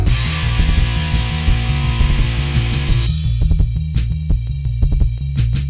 Loop created with Voyetra Record Producer with sounds from my KC Drums and guitar sample packs. Tempo is 170 BPM.